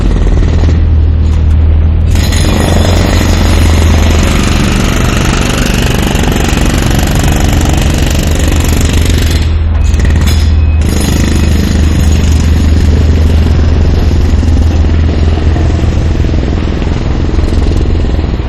street works / obras en la calle